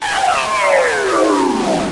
Processed sound from phone sample pack edited with Cool Edit 96. Stretch effect applied then gliding pitchshift, echo,flanger and distortion.
stretch, male, distortion, scream, mangled